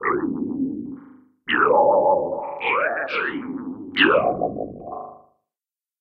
zarkovox lo
More words in a synthetic alien language? Vocal formants applied to low frequency FM synthesis, some reverb and spectral sweeping.
formant, horror, sci-fi, sound-effect, alien, synth